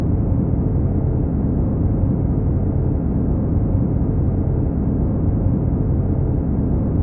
Facility Hum Ambience Loopable
ambience, lab, industrial, atmosphere, ambiance, sci-fi, soundscape, background, machinery, tone, indoors, room, drone, background-sound, loop, ambient, facility, engine, roomtone